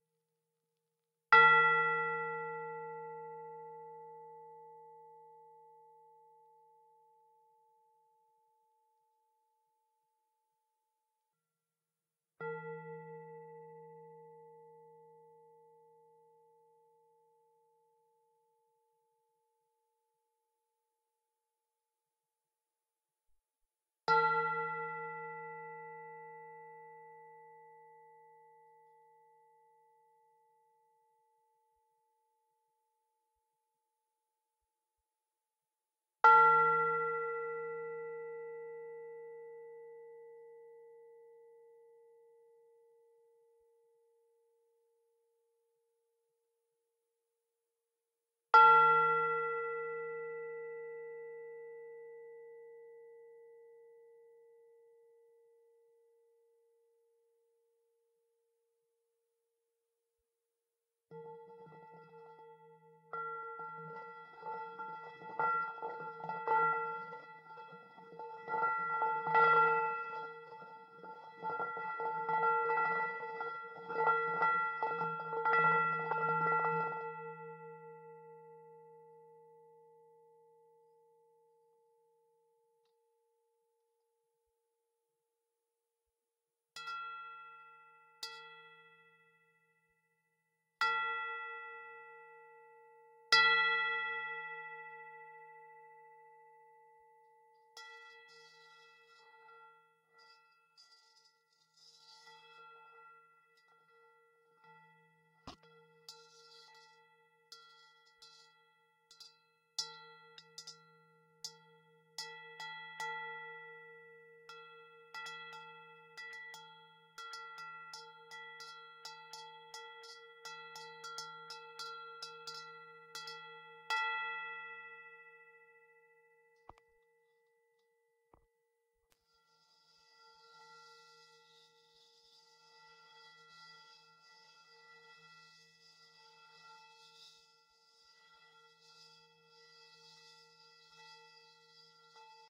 singing bowl
A recording of a singin-bowl using a DIY piezzo mic.
bell, piezzo-mic